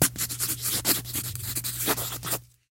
writing-long-sketch-02
Writing on paper with a sharp pencil, cut up into phrases.
drawing, foley, paper, pencil, sfx, sound, write, writing